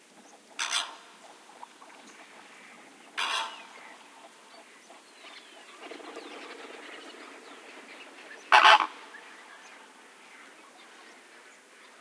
a solitary Greylag goose honks as he flies, singing from waterfowl in background. Recorded near Caño de Guadiamar, Doñana National Park (Spain) using Sennheiser MKH60+MKH30 into Shure FP24 and Edirol R09 recorder. Decoded to mid-side stereo with free Voxengo VST plugin